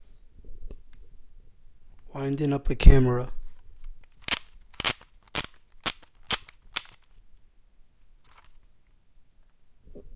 Winding up a disposable Camera
I used a Condenser mic to record this sound. I wound up my disposable camera at cvs.
camera, wind, motor, up, disposable